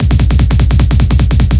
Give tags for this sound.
Psytrance
Trance
Drumroll

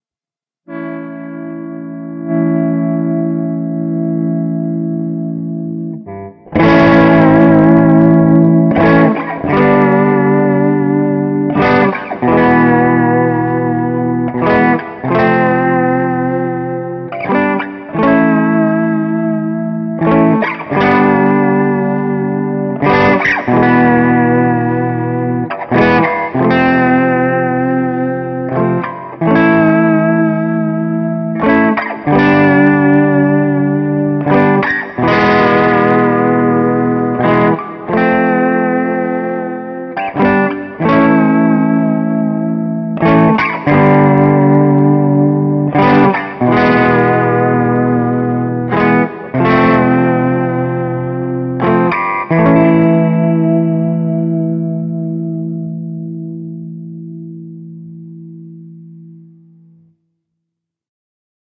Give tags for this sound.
bends
big
chords
clean
electric
grunge
guitar
notes
old
retro
rock
school
tone
tremolo
ugly
vibe
vibrato
vintage

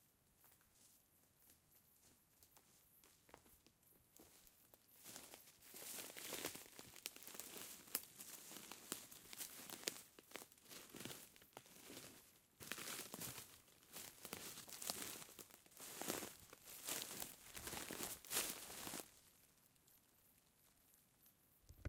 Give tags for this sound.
footstep
footsteps
walking

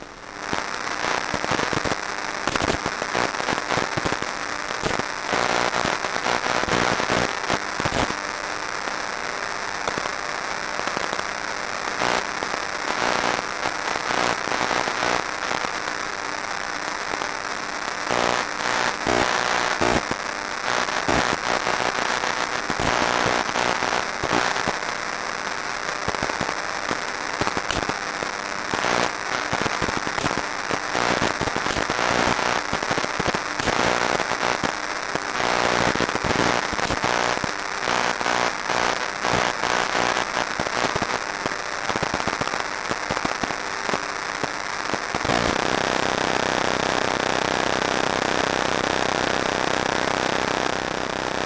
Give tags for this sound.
coil
emf
mic

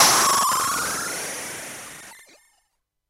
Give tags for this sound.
fr-777
future

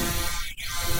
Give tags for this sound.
loop
musical
sequence
sound
space

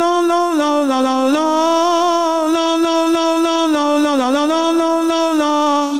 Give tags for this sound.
ambiance
ambient
dance
effect
electronic
happy
horror
loop
loopmusic
short
sing
sound
synth
vocal

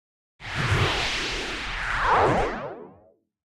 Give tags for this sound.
Fantasy
Energy
SciFi
Sound-Design
Video-Game
Sci-Fi
Magic

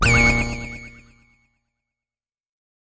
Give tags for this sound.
gamesound
indiedev
indiegame
sfx
sound-design
soundeffects

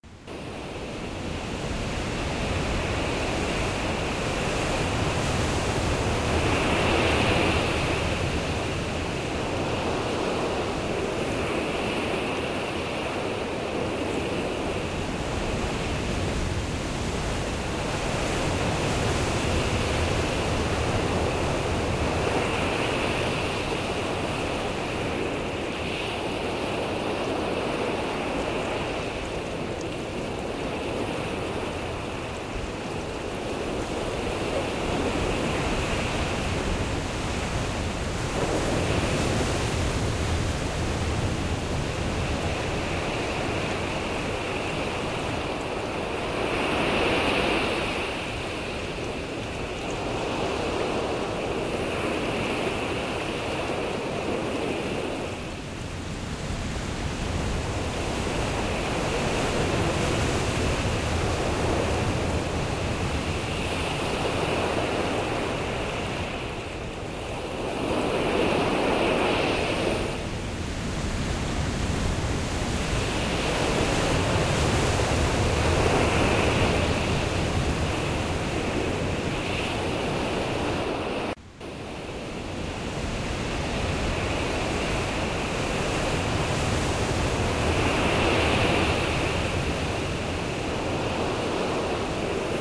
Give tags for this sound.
sand
ocean
surf
ocean-sounds
beach
waves
water
sea-waves
coast
seaside
shore
sea
wave

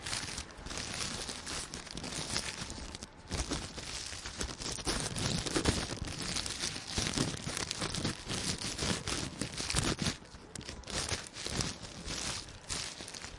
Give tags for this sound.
bag
plastic
rustling
trash-bag

